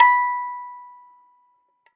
metal cracktoy crank-toy toy childs-toy musicbox
childs-toy, cracktoy, crank-toy, metal, musicbox, toy